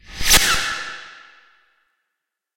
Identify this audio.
A high pitched and high speed "zing" sort of sound, perhaps for something moving very fast.